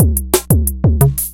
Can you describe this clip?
drum loop using tr808 sounds detuned in sampler. 2 guessing at 160 bpm